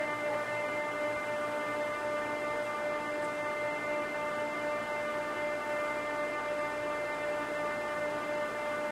annoying generator
Steady generator noise
narrative, sound, technology